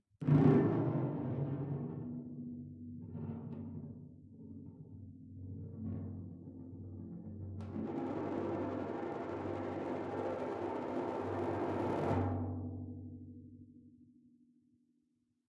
timp71 coinspin
spinning a coin (i think it was a penny) on the head of a timpano (kettle drum). the mic (marshall MXL 2003) is close, about 15 cm above the head... the coin rolls around the head a bit, then comes to rest under the mic (which took quite a few tries :> ) this is on the larger of the two timpani (71 cm). unprocessed except for a little noise reduction.
flickr, coin, spin, timpani